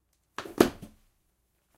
Body falling to floor 5
A body falling hard to a wood floor, natural reverberation present.
collapse, ground, falls, hit, falling, dropping, impact, drop, body, collapsing, floor, fall, collapses